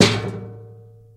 recordings from my garage.
metal, machine, industrial, tools